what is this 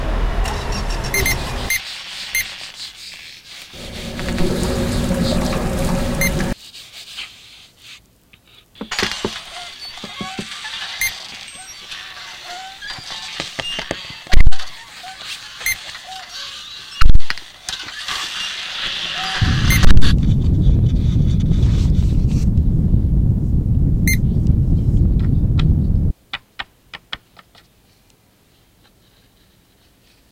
Caçadors de Sons Dr. Puigvert
Soundtrack from the workshop "Caçadors de sons" by the students from "Dr. Puigvert" school.
Composició del alumnes de 3er de l'ESO del Institut Dr. Puigvert, per el taller Caçadors de sons.
Fundacio-Joan-Miro, Barcelona, Tallers, Cacadors-de-sibs